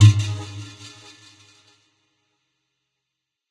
Log Stab
Tweaked percussion and cymbal sounds combined with synths and effects.
Chord, Oneshot, Percussion, Short, Stab